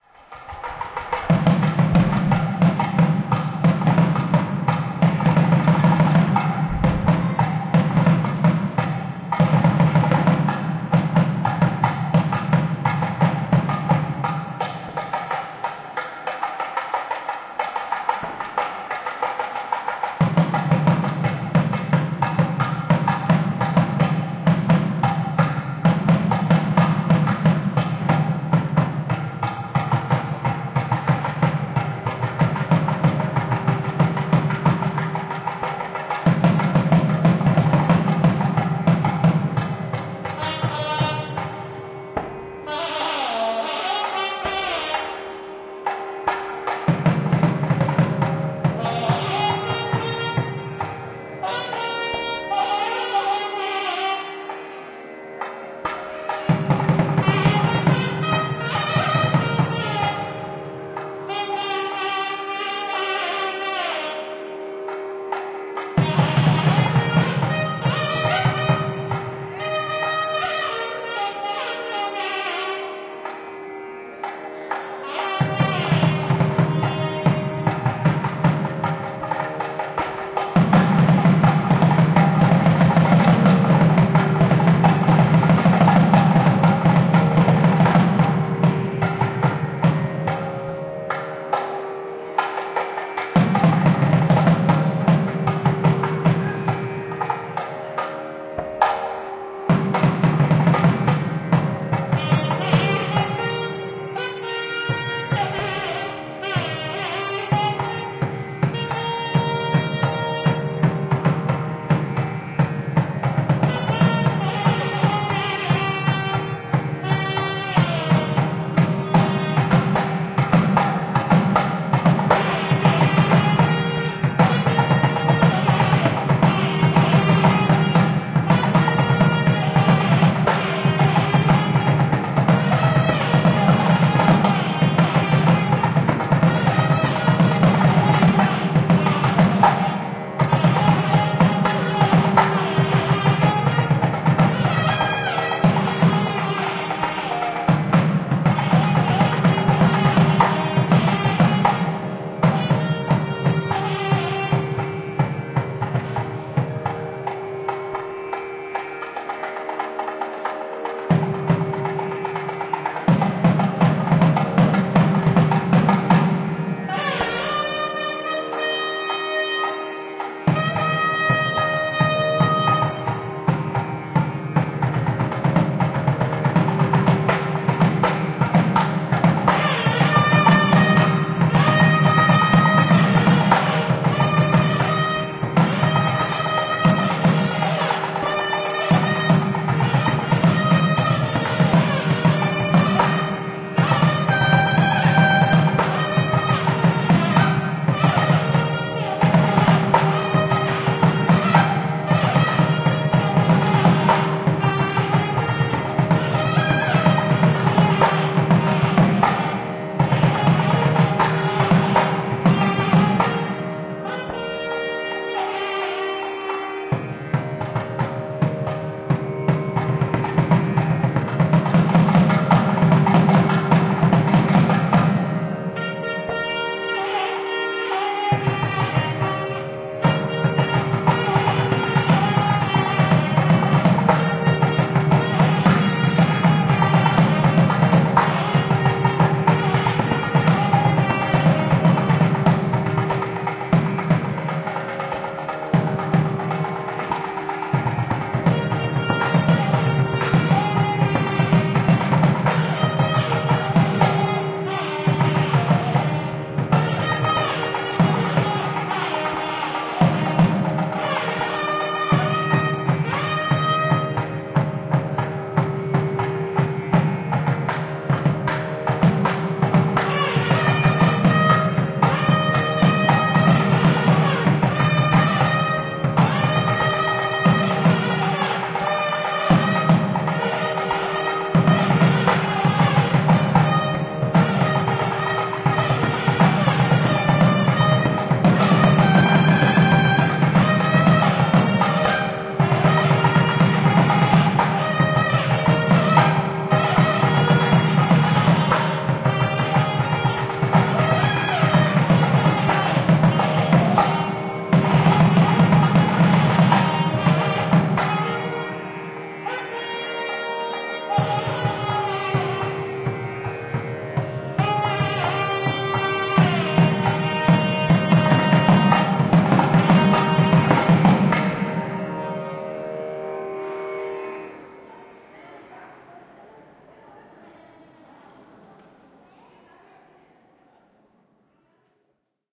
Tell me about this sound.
hindu musical ceremony in the temple
two man playing ritual song during the ceremony (Pūjā) with Shehnai, Tabla and Digital Tampura (raagini digital) in Ekambareswarar Temple, Kanchipuram
mantra, pray, prayers, chant, hindu, praying, ecstasy, religious, religion, temple, raagini, Tabla, folklor, prayer, Shehnai, india, crowd